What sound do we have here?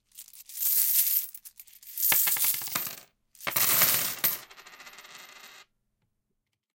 coins from hand to table
box, coins, counting, money, wooden